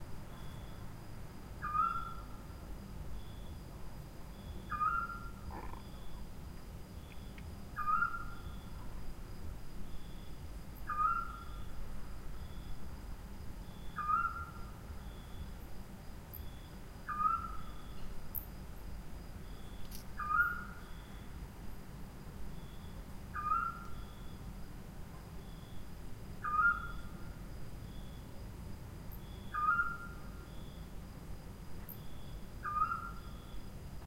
screech owl
hoot, nature, hooting, bird, screech, night, field-recording, owl, owls